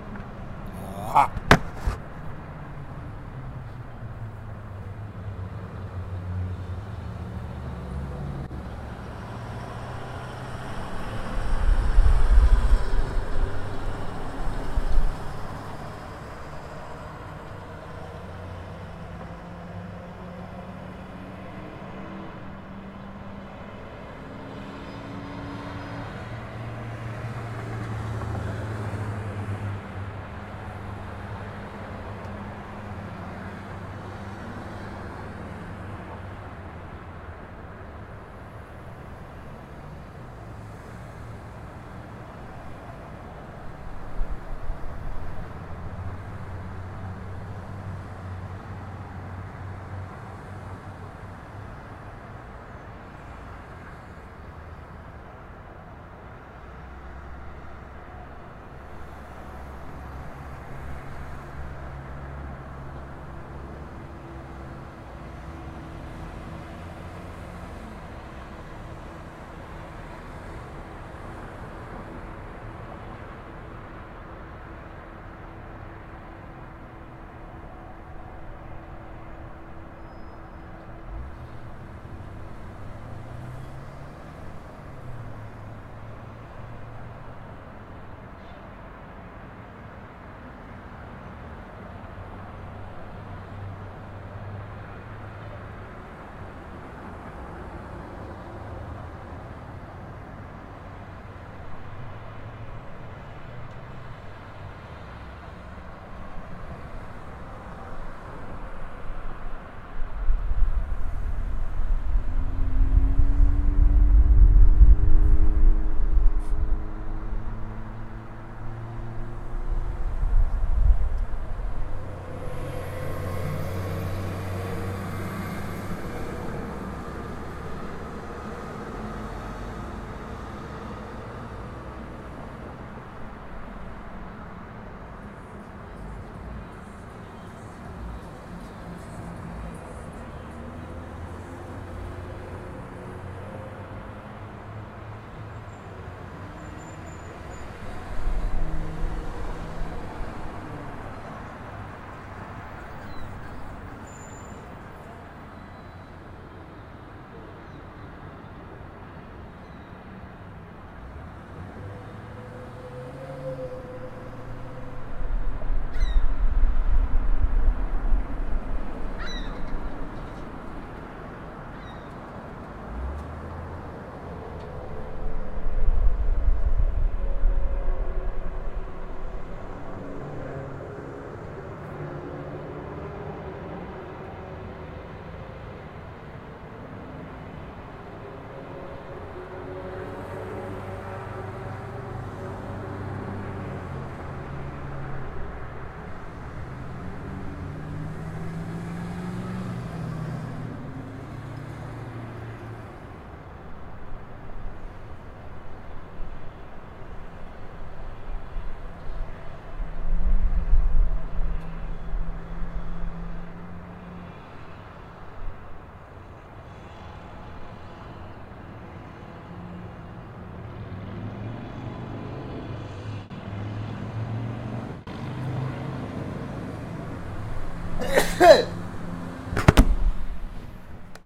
small town traffic